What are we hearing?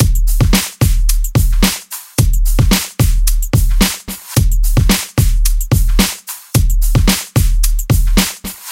Created in Hydrogen and Korg Microsampler with samples from my personal and original library.Edit on Audacity.
bpm, drums, pack, korg, free, fills, loop, library, groove, pattern, edm, sample, beat, kick, hydrogen, dance